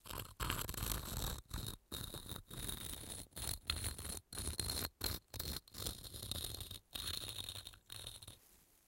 smaller Stone scratching over rock (close up), from left to right, H6
This sound was recorded with a Zoom H6 at 41 khz / 16 bit.
I used the MS-Mic.
Due to the MS-Mic (i guess) there is a phasing problem wich can be heard :(
arenite, close-up, rock, sandstone, scraping, scratching, small-stone, stone